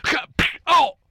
ka pow ow
another clipping from professional recordings of famous vocal artist Luke Michaels
funny, sfx, male, clip, vocal